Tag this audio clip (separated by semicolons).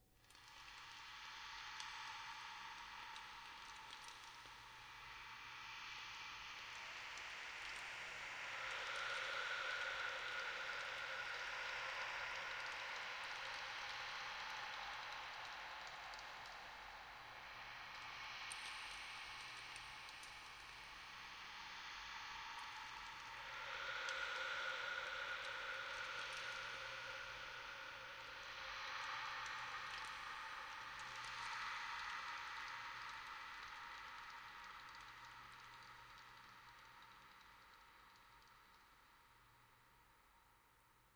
ambience; Ambient; breath; breathing; cold; drone; ice; icey; reverb; wind; winter